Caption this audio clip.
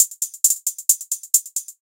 hi hat loop